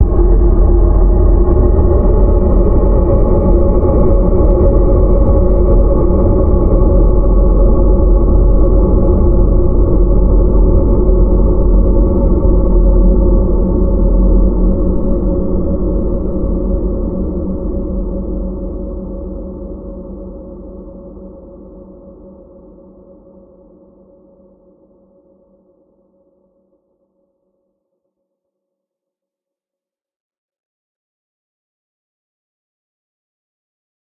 AMB02 - Zombie Ambience sounds From my ZOMBIE VOICE sound pack.